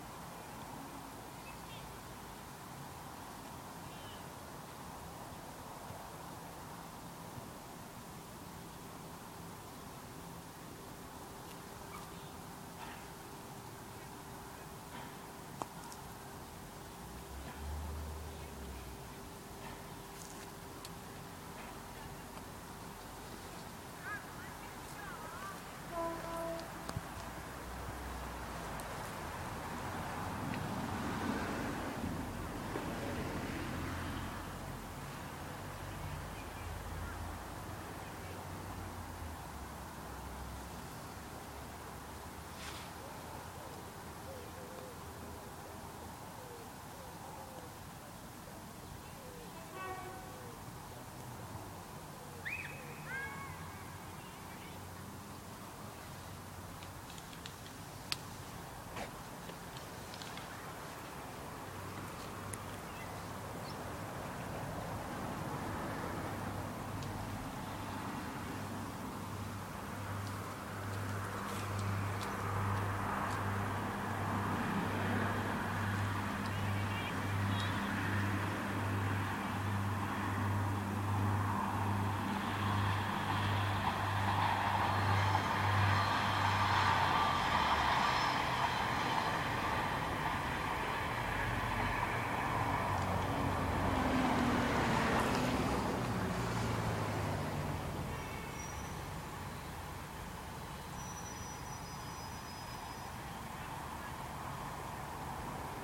A short train passes along the railway line in Swinton, South Yorkshire, UK. First horn at 0:25, second at 0:49 (though this could have been another train). Passes me at 1:07.
I'm no expert on trains but I think this was a 'Pacer' of some description, operated by Northern Rail.
rail, pacer, railway, field-recording, horn, thump, northern, train, transport